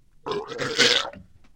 Death Grunt 2 - The Ridge - Spanker
Part of a boss-enemy I made for a student-game from 2017 called The Ridge.
Inspired by the Bioshock Big Daddy and The Boomer from Left 4 Dead.
Recorded with Audacity, my voice, a glass of water and too much free-time.
Alien-Species, Strange, Mutant, Crazy, Paranormal, Bioshock, Monster, Unusual, Vocal, Disgusting, Weird, Sci-fi, Grunt, Alien